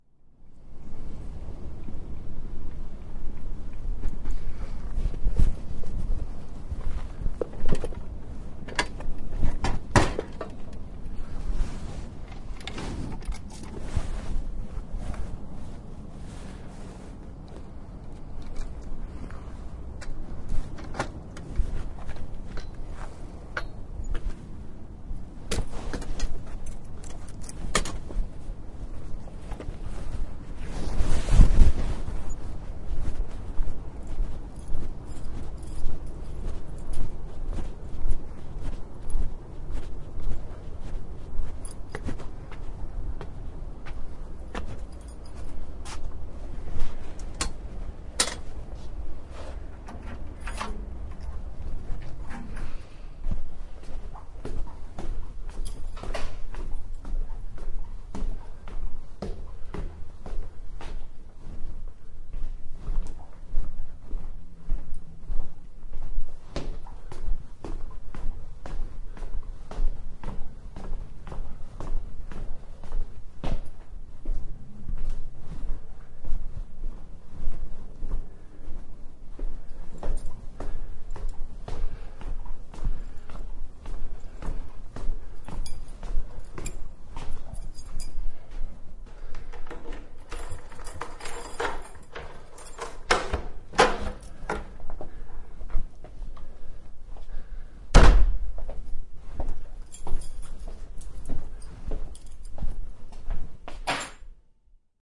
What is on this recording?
Coming Home 3
I'm arriving with my bike and fasten it to an appropriate piece of street furniture. I walk towards and climb the stairs that lead from the street to the door that gives access to the staircase I share with 7 others and find my keys. I unlock the door to open it. I climb the stairs to the third floor where I open the door to my apartment. I close it again, walk into my livingroom throwing the keys on the table. I wear Converse Jack Purcell shoes and corduroy jeans. Recorded with an Edirol-R09.